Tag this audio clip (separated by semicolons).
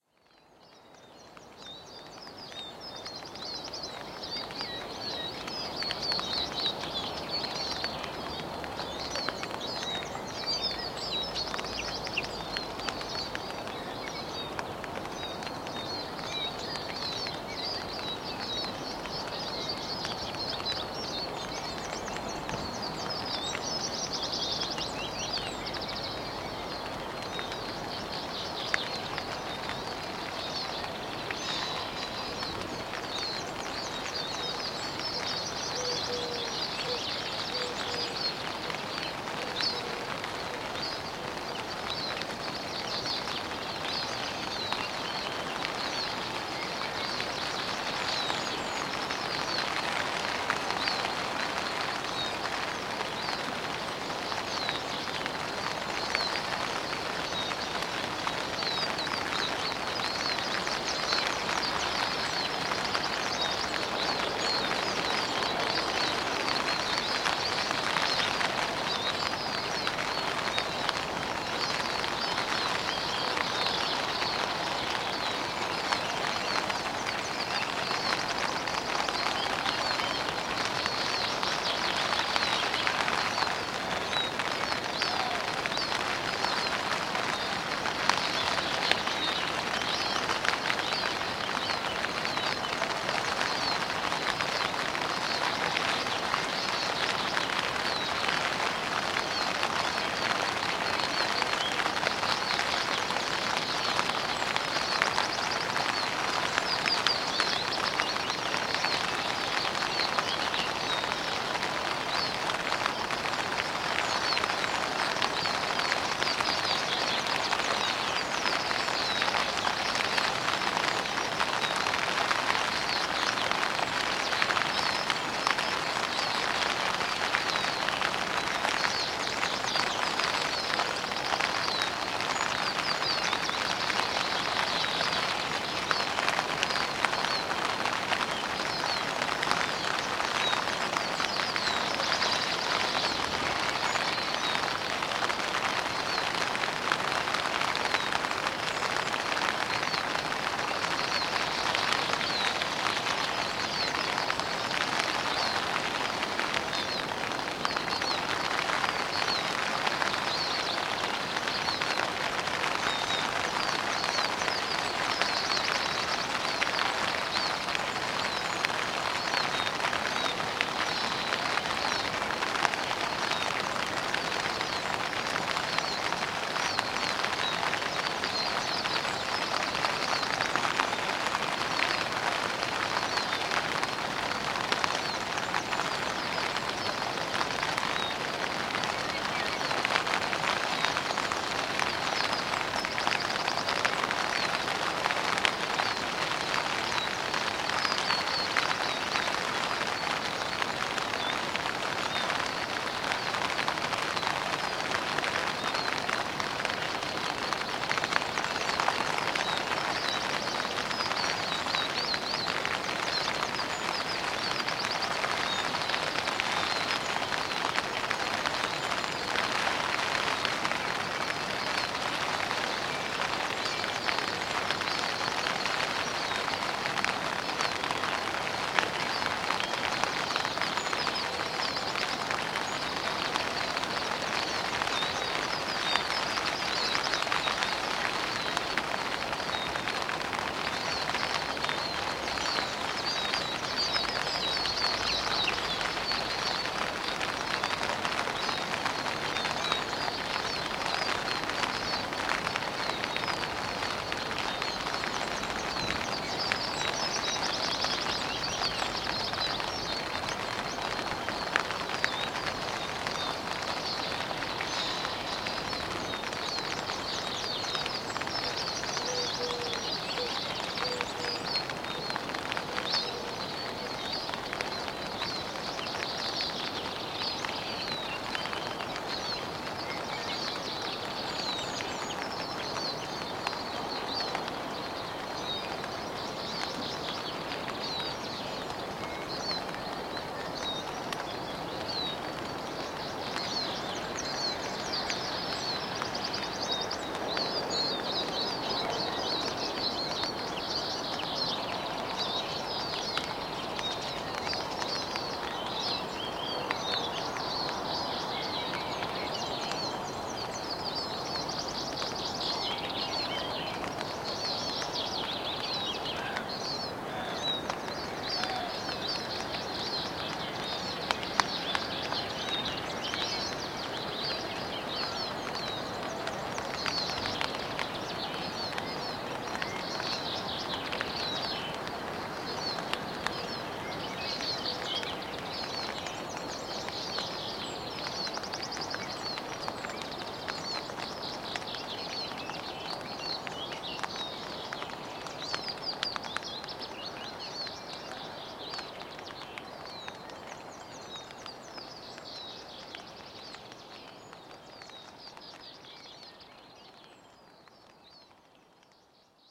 rain; field-recording; tent